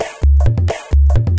Perc Loop 13
Looped shots, rhythmic sounds for electronic experimental techno and other. Part of the Techno experimental Soundpack
groovy, rhythmic, loop